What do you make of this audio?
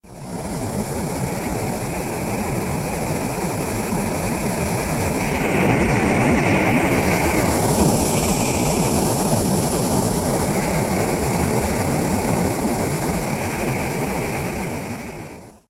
Simulated jet engine burner
Created by processing the sound of an old gasoline burner in Audacity
jet, engine, rocket, airplane, jet-engine, aircraft, plane, launch, flight, aeroplane, thruster, burner, fly-by, aviation, engines